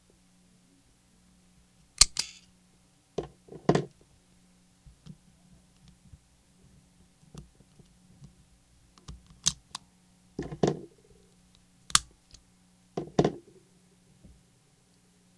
Unloading Magazine
Unloading the magazine for a Smith and Wesson 9MM
Ammo, gun, pistol, Smith-Wesson, Unloading